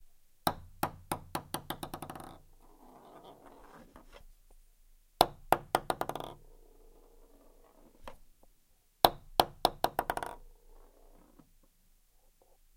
Ping Pong Ball Hitting Floor
Letting a Ping Pong ball hit the floor a few times.
ball, bouncing, floor, light, ping, plastic, pong, table, weight